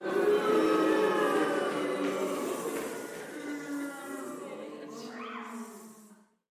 Boo! and hissing!
Boo! and hissing
adults, audience, auditorium, Boo, crowd, group, theatre